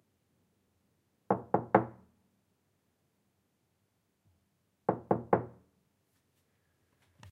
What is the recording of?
Knock on Door 2
Knock on door
door, knocks